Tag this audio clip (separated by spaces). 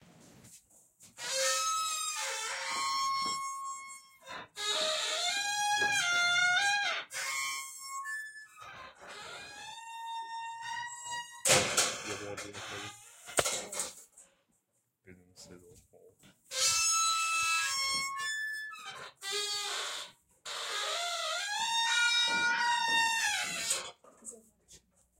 Opening Closing Close Gate Creepy Squeak Garage Door Creaking Open Horror Squeaking Metal Creak